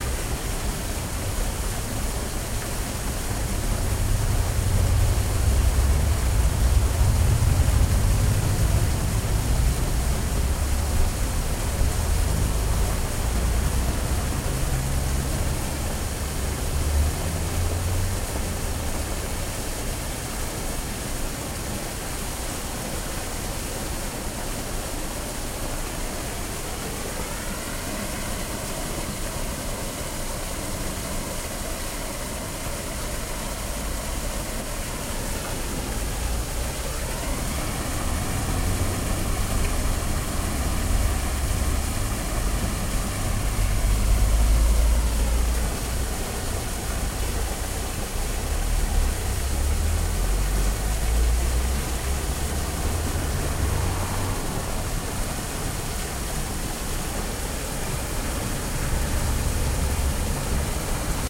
Traffic + Rain
Traffic with strong rain. Recorded with Tascam DR-03
cars, rain, street, city, ambience, traffic, field-recording, car